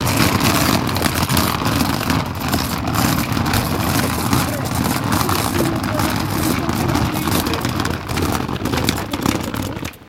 walking and dragging the suitcase down to sidewalk
suitcase, sidewalk, walking